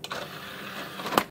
Disc drive close
DVD drive closing with a disc inserted.
cd, disc